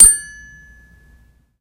My toy piano sucks, it has no sustain and one of the keys rattles. This really pisses me off. So I hit the working keys like an xylophone for those unimpressed with my other versions.